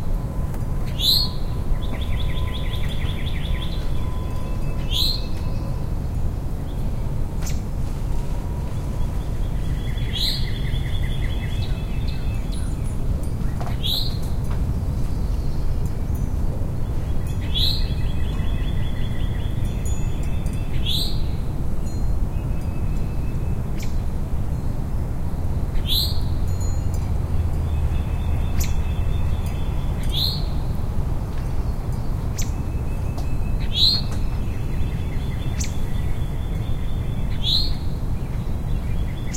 outdoor ambience
Various birds chirping, ambient wind noise, wind chimes, and a few creaks recorded on a window sill. Recorded with a Roland Edirol R-09HR and edited in Audacity.